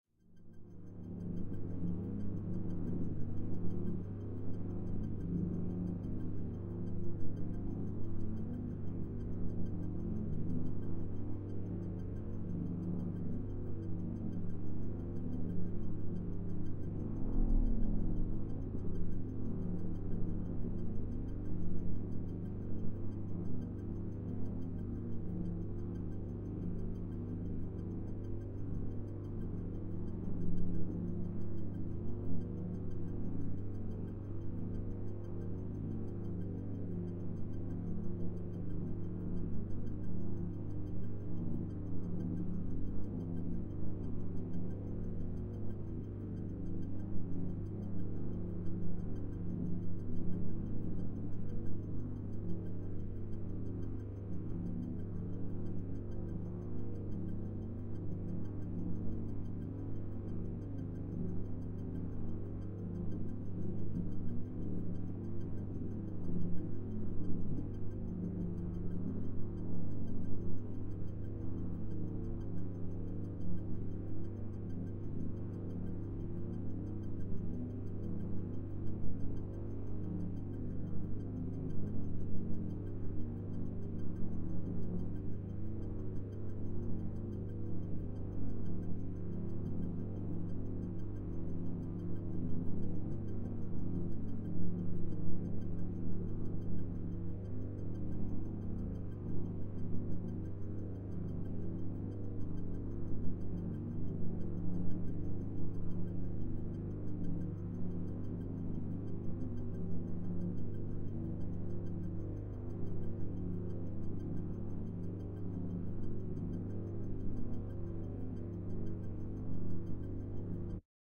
creepy or suspenseful ambiance
A creepy/suspenseful ambiance with a lower-pitch hum and higher pitch pings bouncing between L and R.
Found in my old recordings, not sure of the way it was produced. Sounds like a granular stretch of some noise.
creepy, ufo, thrill, sinister, suspense, terrifying, background-sound, anxious, alien, ambience, spooky, haunted, hum, ambiance